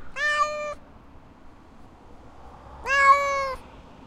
I saw a very noisy cat when I was walking around, it let me get very close to it, but then got scared and ran off. Unfortunately there was a car passing as I recorded. Captured with Zoom H4 onboard mics.
animal, cat, meow, outside